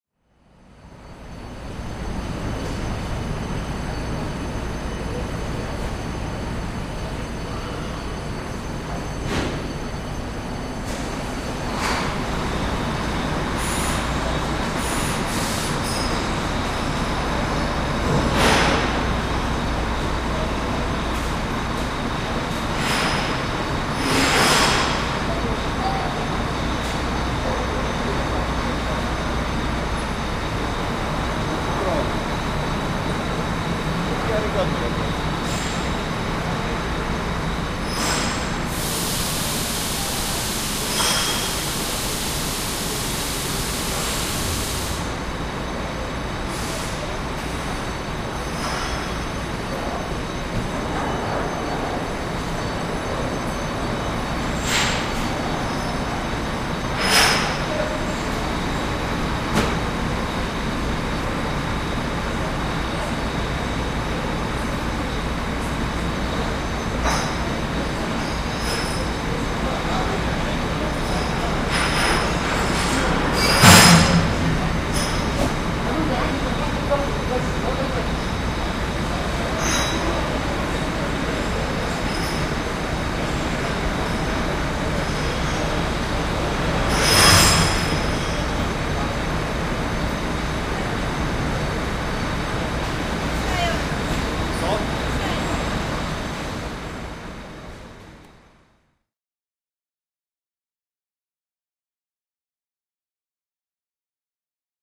Sound from ferry
ferry, genua, tunis